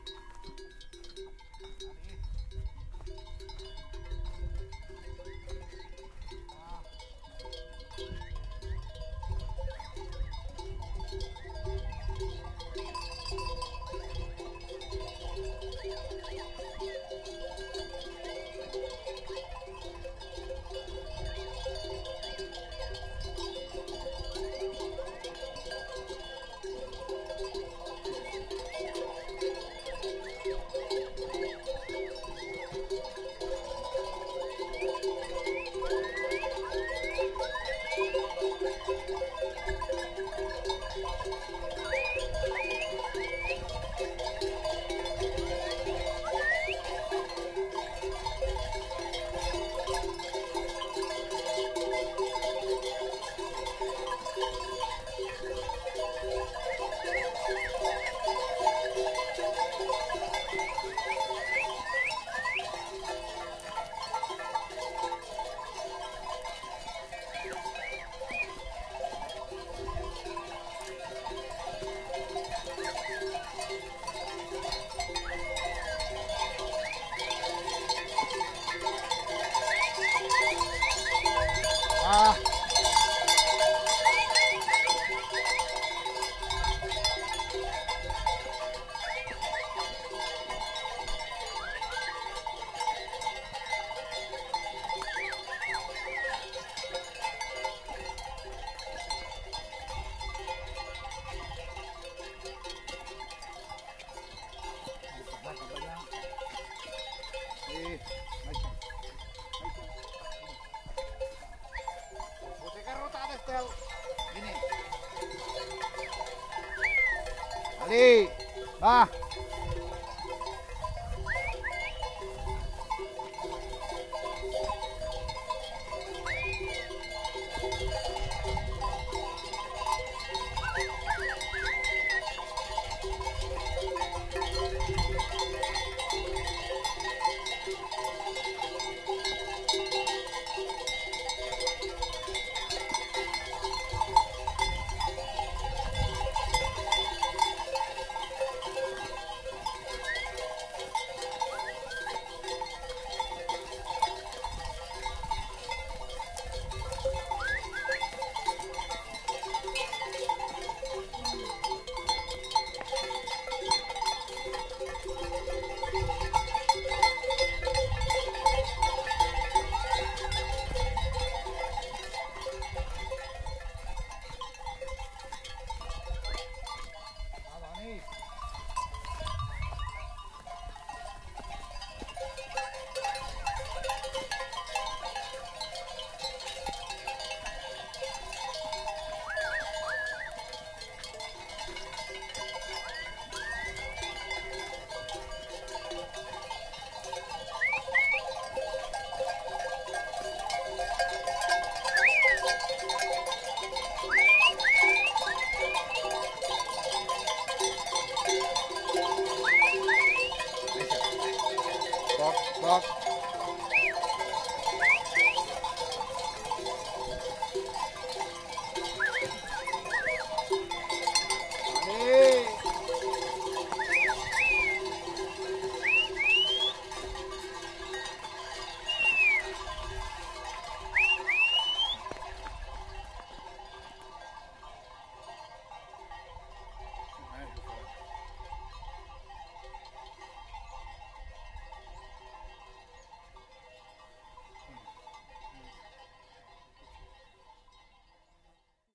shepherd in the mountain